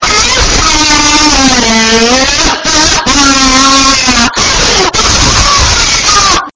A woman screaming.
666moviescreams, female, pain, scream, woman